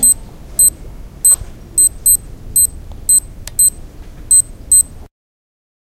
Sound of an electrocardiogram made with a digital watch.
watch, digital, electrocardiogram